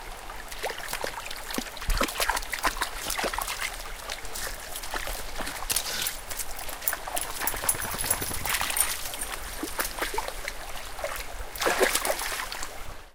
dog steps around in water
You hear dogs walking around in a small river.
Animal, Dog, feet, field-recording, foot, footstep, footsteps, forest, Lake, nature, river, Running, step, steps, walk, walking, Water, wood